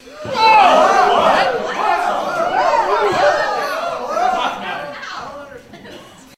Shocked Audience
Recorded with Sony HXR-MC50U Camcorder with an audience of about 40.
startled, shocked, crowd